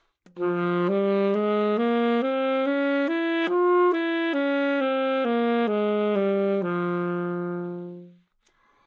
Part of the Good-sounds dataset of monophonic instrumental sounds.
instrument::sax_alto
note::F
good-sounds-id::6841
mode::natural minor